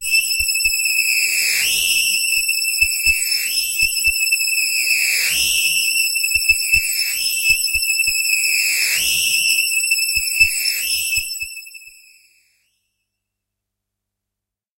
Dirty Phaser - E7
This is a sample from my Q Rack hardware synth. It is part of the "Q multi 008: Dirty Phaser" sample pack. The sound is on the key in the name of the file. A hard lead sound with added harshness using a phaser effect.